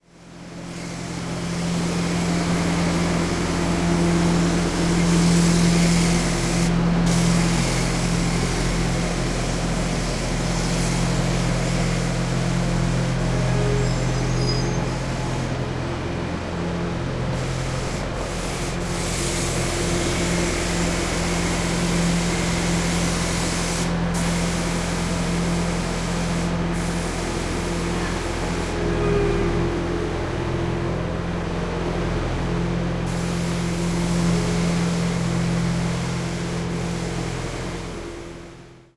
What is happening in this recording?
Street cleaning
Cleaning the street with pressured water, motor and generator sound. November 2013. Zoom H2.